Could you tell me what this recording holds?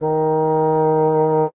Fgtt 51 Eb2 2a
fagott classical wind
classical, fagott